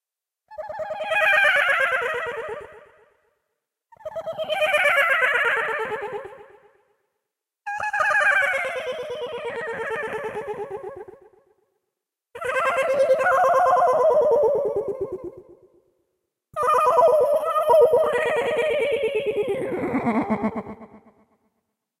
Comic Ghost Voice
Believe it or not, only an echo filter was used... Don't know why my body makes such noises ehe.
boo, rpg, voice, comic, sweet, ghost